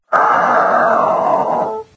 Rotten Zombie Death
The sound of an extremely decomposed zombies death rattle.
monster, rot, rotted, die, scary, dies, weird